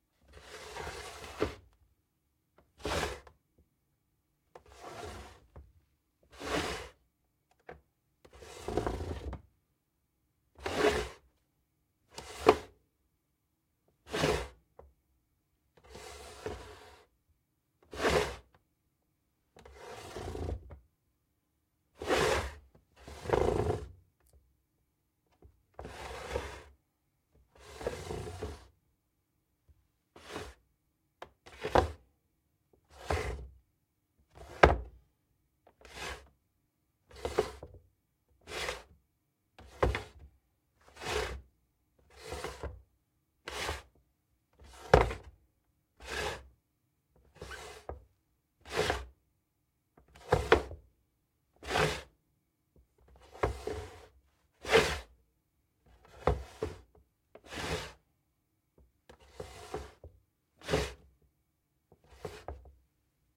Pushing Pulling Chair Table Wooden Furntiure Across Hard Floor Pack
Dragged, Kitchen, Pulling, Pull, Wooden, Concrete, Table, Wooden-Chair, Drag, Wooden-Table, Moving, Pushing, Foley, Floor, Slide, Chair, Tug